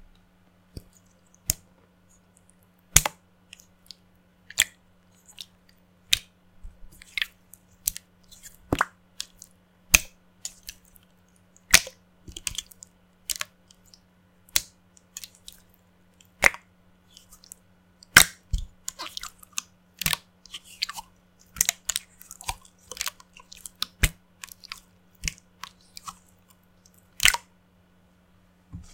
Playing with a milky slime
Playing with a milky/watery slime. Recorded with a Blue Yeti.